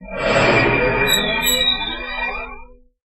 Guitar slide breathing